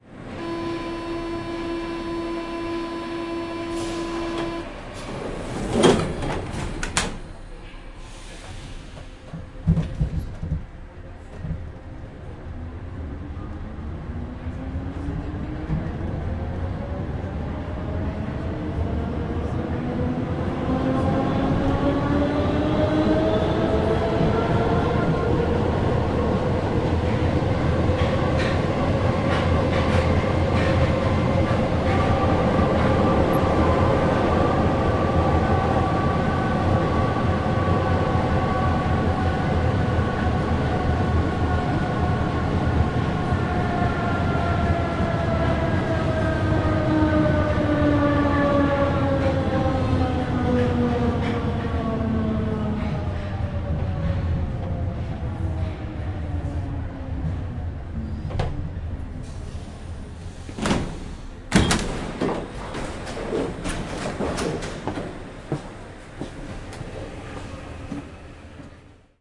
Ride between two stations on Paris Métro Line 10
A ride between two stations on Line 10 of the Paris Métro. Warning buzzer sounding, doors closing, train starts and continues to next station and stops, doors opening, footsteps of people boarding. The trainset is MF 67 series E, the standard trainset on Line 10, with rheostatic braking and a characteristic sound.
doors, field-recording, mf-67, mtro, paris, public-transportation, subway